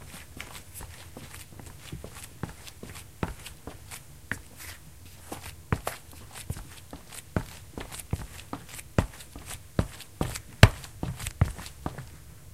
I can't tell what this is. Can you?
passos me
that me recording my step sound in a silent ambiance (inside my room).
i was not really walking, just making some static steps in front of the
microphone. (i needed those for a short film)